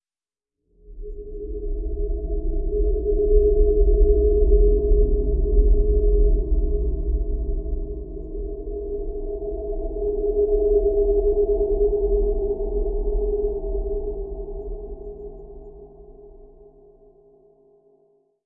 LAYERS 004 - 2 Phase Space Explorer is an extensive multisample package containing 73 samples covering C0 till C6. The key name is included in the sample name. The sound of 2 Phase Space Explorer is all in the name: an intergalactic space soundscape. It was created using Kontakt 3 within Cubase and a lot of convolution.